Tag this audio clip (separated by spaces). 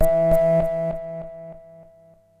100bpm electronic multi-sample synth waldorf